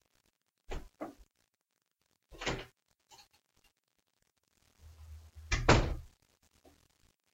Door opens and close
A sound effect of a door opening and closing
Door-close, Door, Door-open